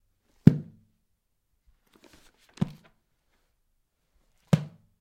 The opening and closing of a book. You can hear the book open loudly and abruptly, the pages move as it is opened and then the book closed loudly and abruptly. Recorded with a Zoom H6 and a stereo microphone (Rode NTG2).